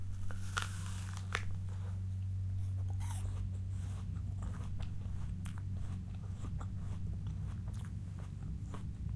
biting into apple
the sound of me biting into a red-delicious apple. recorded with a SONY linear PCM recorder in a professional recording studio. recorder was placed on stand several inches away from mouth.
aip09, apple, bite, crunchy, food